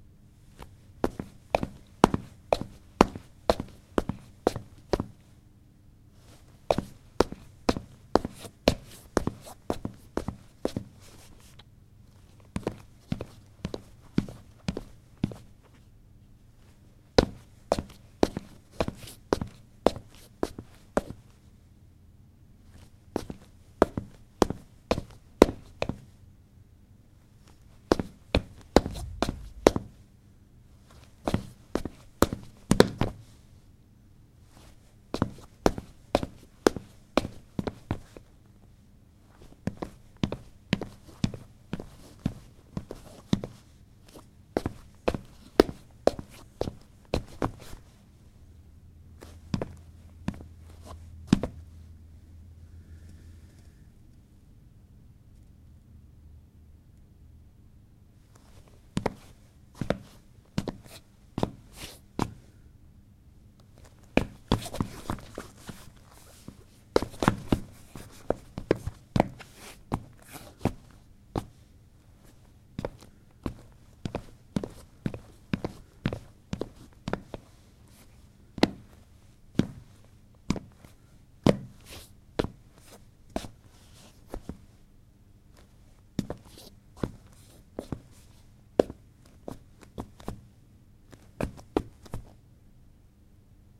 sfx turnschuhe auf parkett 03
Walking on wooden floor with sport shoes
running, steps